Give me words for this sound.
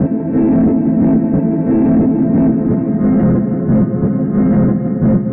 Real-Reggea Dist Chops
Extremely abstractified rasta-dub guitar chops.
ragga, rasta, reggae